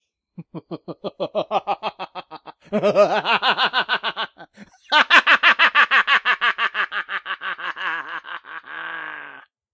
A longer more mad scientist laugh then anything